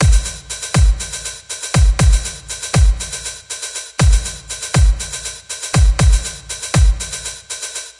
Just a drum loop :) (created with flstudio mobile)